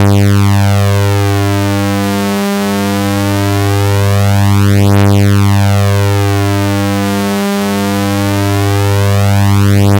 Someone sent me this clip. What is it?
Flanging Sawtooth 10 Seconds
Created in LabChirp using a sawtooth wave in a frequency of 100Hz and a flanger in 10
Flange, Sawtooth, Wave